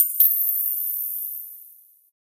Bright digital GUI/HUD sound effect created for use in video game menus or digital sound application. Created with Xfer Serum in Reaper, using VSTs: Orbit Transient Designer, Parallel Dynamic EQ, Stillwell Bombardier Compressor, and TAL-4 Reverb.
application, artificial, automation, bleep, blip, bloop, bright, click, clicks, command, computer, data, digital, effect, electronic, game, gui, hud, interface, machine, noise, pitch, serum, sfx, short, sound-design, synth, synthesizer, windows